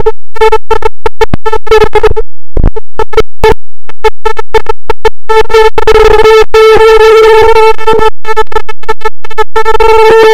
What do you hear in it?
I generated a 440 sine wave in audacity and heavily processed it. It sounds like a radio that is breaking up.